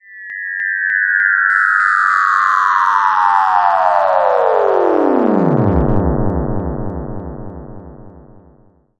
UFO Hạ Cánh
UFO landing like from Hanna Barbara's space cartoons (stereo):
AUDACITY
For right channel:
- Generate→Chirp...
Waveform: Sine
Frequency Start: 1800
Frequency End: 1
Amplitude Start: (0.3)
Amplitude End: (0.3)
Interpolation: Linear
Duration: 00h 00m 06.000s
- Tracks→AddNew→MonoTrack
- Generate→Silence...
Duration: 00h 00m 16.000s
- Tracks→Mix and Render
- Effects->Echo
Delay time: 0.3
Decay factor: 0.8
- Cut become 9.0s
-Effect→Fade In
From 0.0s to 1.2s
- Effect→Fade Out
From 6.0s to 9.0s
For left channel (same formula for right channel except Chirp):
- Generate→Chirp
Waveform: Sine
Frequency Start: 2000
Frequency End: 2
Amplitude Start: (0.3)
Amplitude End: (0.3)
Interpolation: Linear
Duration: 00h 00m 06.000s
alien, sci-fi, space, spaceship, UFO